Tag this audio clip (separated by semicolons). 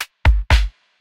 hat; percs; groovy; kick; timing; rhythm; drums; drum; loop; percussion-loop; percussion; quantized; 120bpm; drum-loop; beat; odd